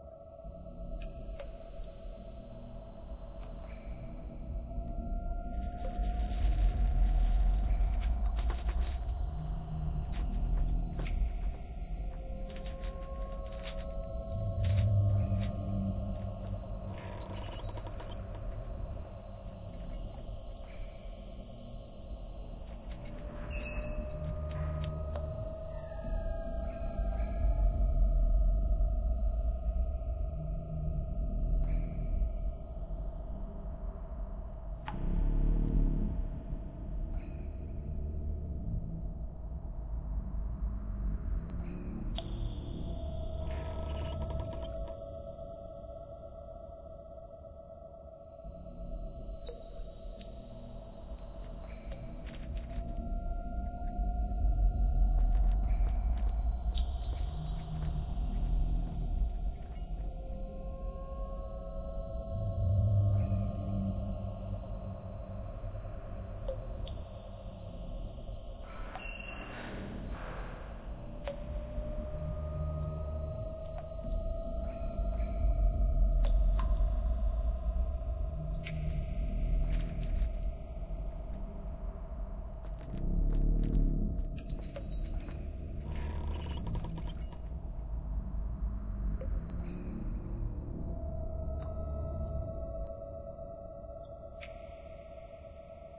Dungeon Ambiance
Creepy dungeon ambient loop created by carefully curating and mixing a wide variety of sounds.
Credits to:
daveincamas for 'Big Chain' audio
DrMinky for 'Creepy Dungeon Ambience' and 'Old Lever Pull'
CGEffex for 'Dungeon gates'
ambiance, ambience, ambient, atmosphere, background, background-sound, dungeon, free, game, good, loop, quality, soundscape